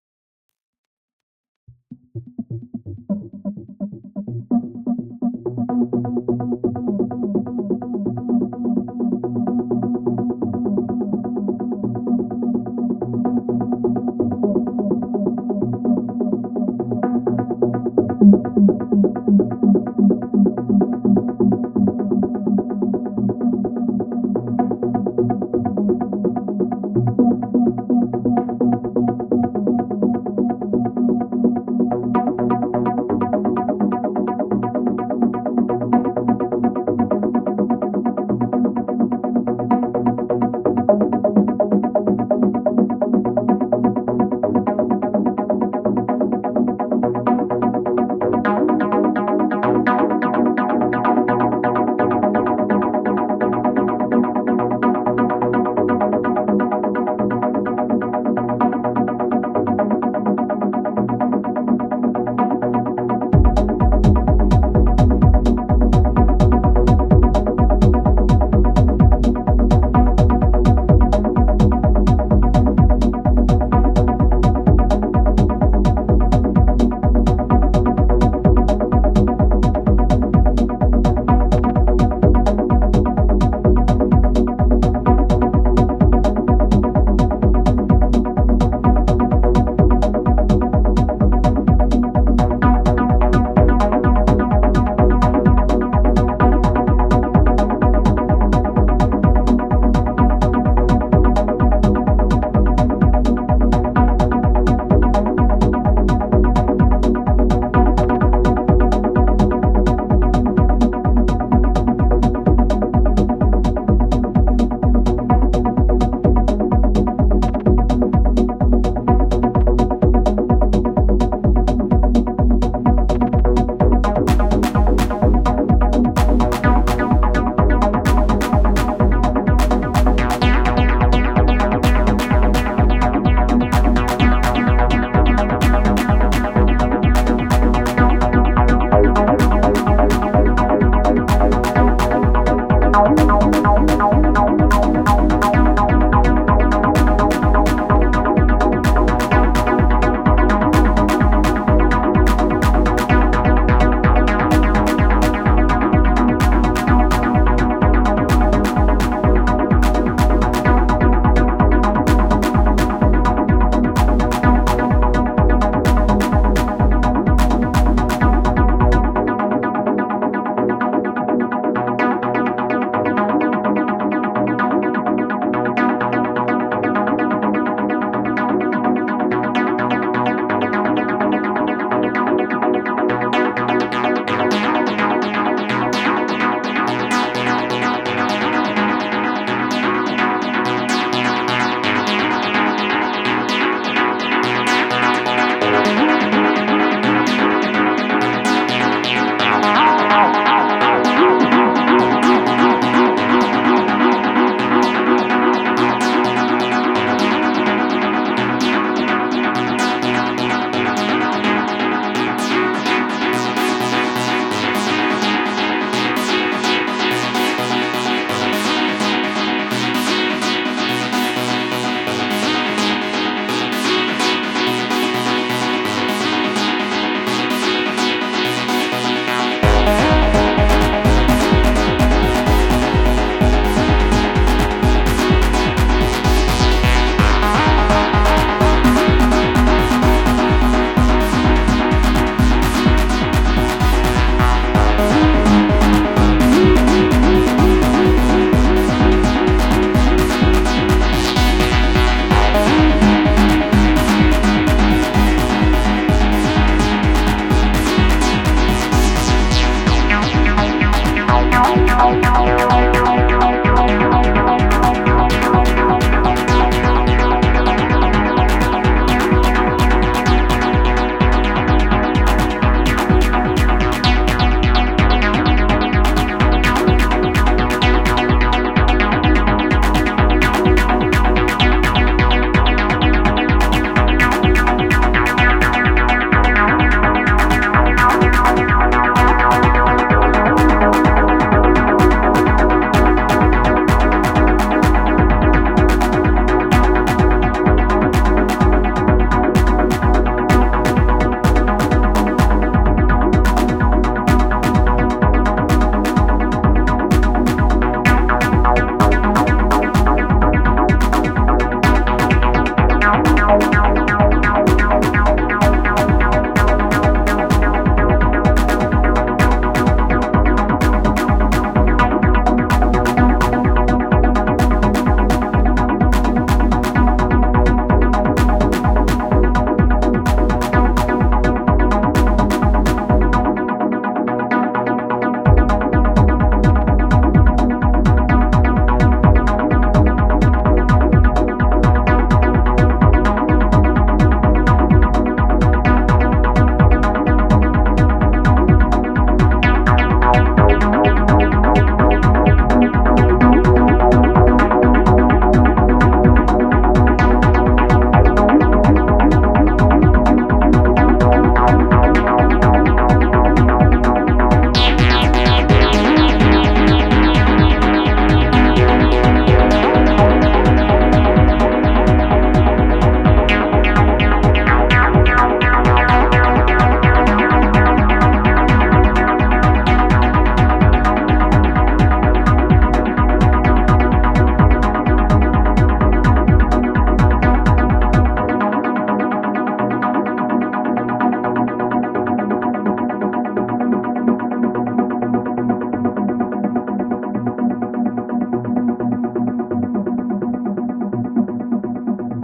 Acid modulation
session, jam, ableton, acid, synth, electronic, rhythmic